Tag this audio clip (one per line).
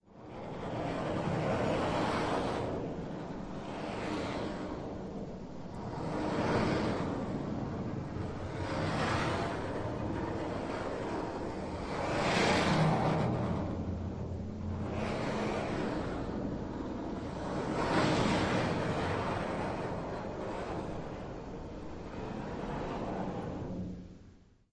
percussion
scrape
drum
bass
coins